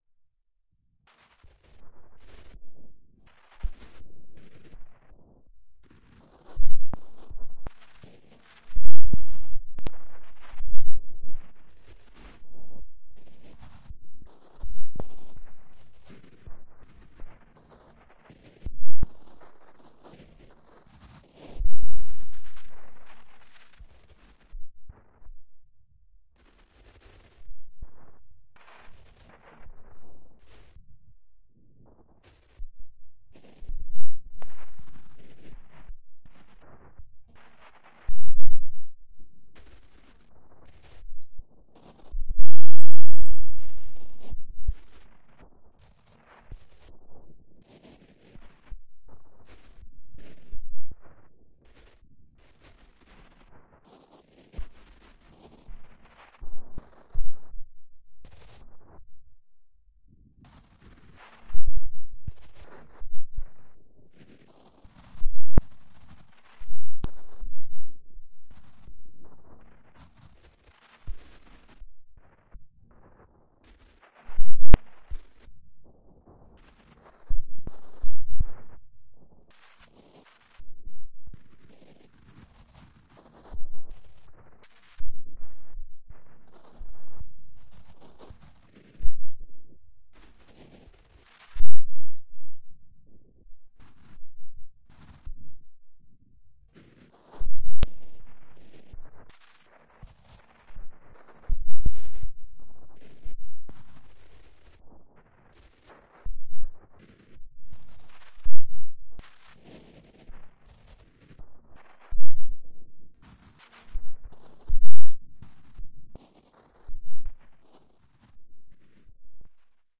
Noise Garden 11
1.This sample is part of the "Noise Garden" sample pack. 2 minutes of pure ambient droning noisescape. Random noise composition.
electronic, soundscape, drone